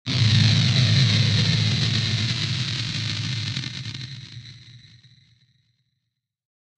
Logo Dissolve, Electric, A
A very, very processed recording of a piano string being struck with a metal mallet. Ultimately sounded like something that would accompany some company's logo fading in at the end of a cheesy commercial. Hopefully there are plenty of other uses.
An example of how you might credit is by putting this in the description/credits:
The sound was recorded using a "H1 Zoom recorder" and edited on 27th November 2017.
dissolve, fade, static, electric, logo